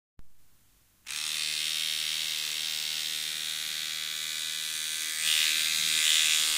Sound of a eletric teeth brush
Teeth, Eletric